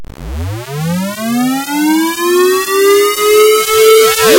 Wave Ramping Up
A simple sound effect used for conveying an increase in power in a video game.